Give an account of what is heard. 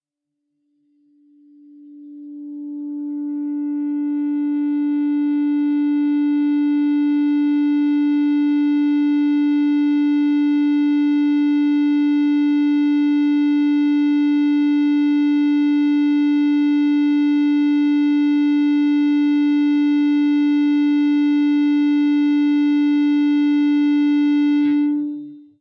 Dist Feedback D-4th str
Feedback from the open D (4th) string.